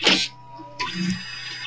caja rrec
Mechanical sound of a Kodak printer.
hydraulic, kodak, machine, mechanical, printer, robot, robotic